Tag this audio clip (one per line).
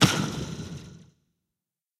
explosion
gun
pulse
space
torpedo